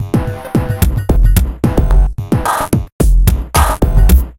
LoWPass EqUalS 11111
SIck BEats from The block -
Sliced and Processed breaks beats and sick rythms for IDM glitch and downtempo tracks Breakbeat and Electronica. Made with battery and a slicer and a load of vst's. Tempos from 90 - 185 BPM Totally Loopable! Break those rythms down girls! (and boys!) Oh I love the ACID jazZ and the DruNks. THey RuLe!